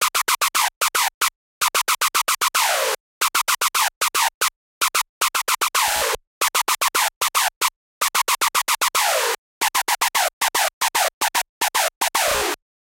a Dry version of this random screech I've made, playing a random pattern.